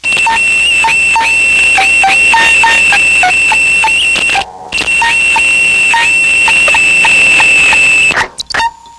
About 10 years ago my friend gave me a guitar he found in the garbage. This is one of the horrible and interesting sounds it would make. These sounds were recorded originally onto a cassette tape via my Tascam Porta07 4-track. This sound is similar to "brokenguitar5" but longer and more notes are played.